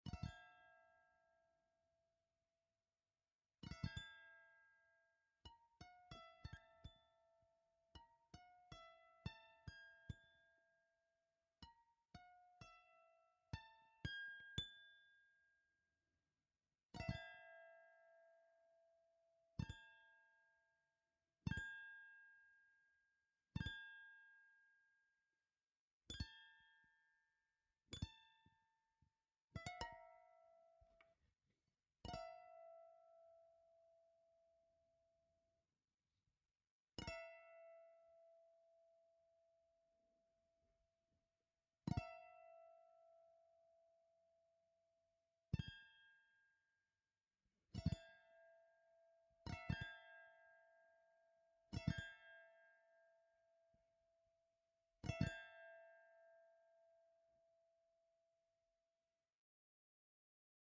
guitar strings 2
This is the sound of the short part of guitar strings plucked over the headstock, between the nut and tuning pegs. They do not make for any particular sounds, harmonies or melodies, just random tones which may be used for some sound design, noises, jingles etc.
I recorded this for my own purposes (game SFX) with 3 different guitars (see the other 2 files as well) and thought I would share it with anyone who may find it useful - if you do, please help yourself and enjoy!
guitar, guitar-strings, ringing, sound-design, steel, steel-strings, strings